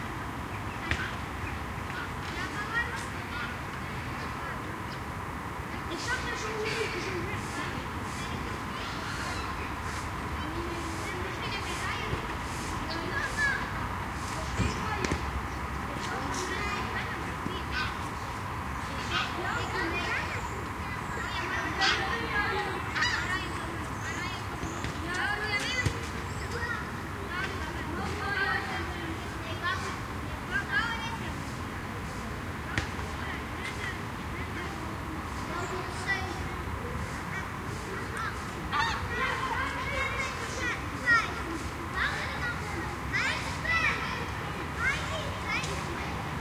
Quiet evening, IJburg, Amsterdam, street noises, kids play. Recorded with a Sony PCM-D100. It is a loop.